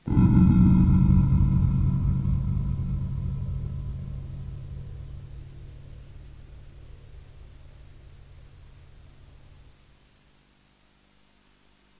This sound is generated by an 80's synthesizer ensoniq sq1 plus which memory banks have gone bad. I recorded the sound because I thought that it would be excellent as a creepy sci-fi spaceship sound